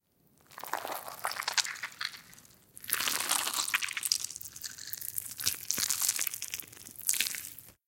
Recorded myself squishing up some pumpkin guts with a rode m5